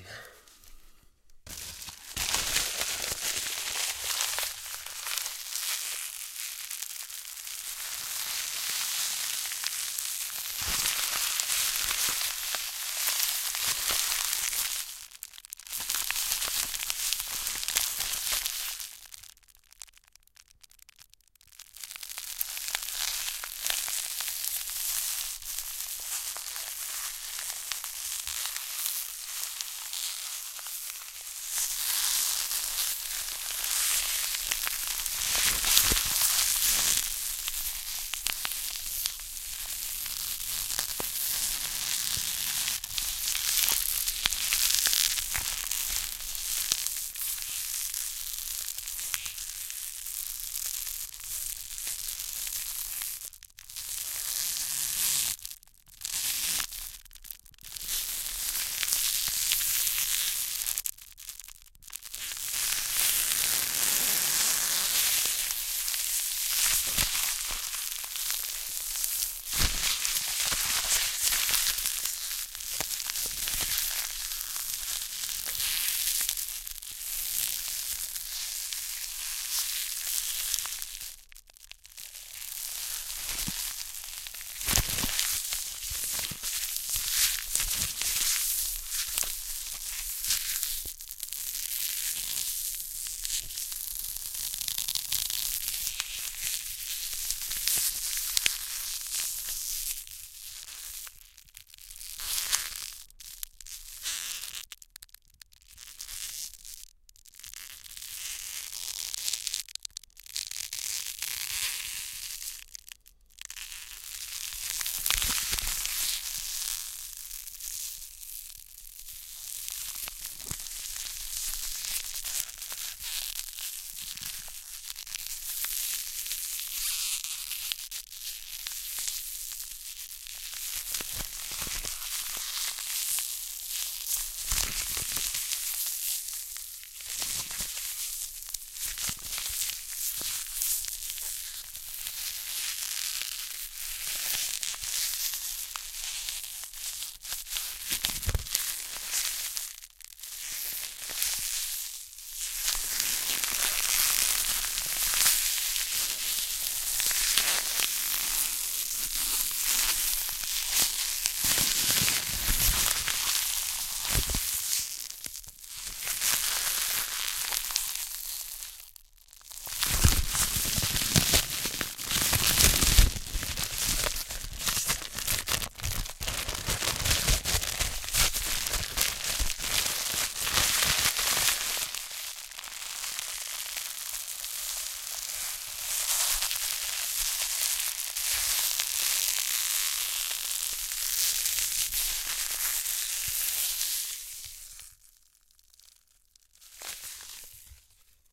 Shivering Sound 09 - Cellophane manipulated
Really unpleasant noises in this pack.
They were made for a study about sounds that creates a shiver.
Not a "psychological" but a physical one.
Interior - Stereo recording.
Tascam DAT DA-P1 recorder + AKG SE300B microphones - CK91 capsules (cardioid)
unpleasant, cellophane, shiver